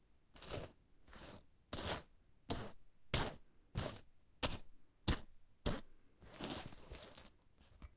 coins over bed being hitted
bed, coin, coins, field-recording, foley, hit